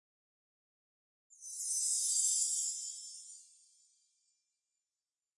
GLEAM-GLOW-SFX-CHIME
08.02.16: A glimmer from left to right with a hint of magical chime-tree in it.
fairies, spell, wizardry, wizard, wand, chime, bells, sparkle, fairy, chimes, magic